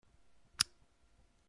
Modern lamp switch, recorded with a Zoom H1.
lamp, Modern, switch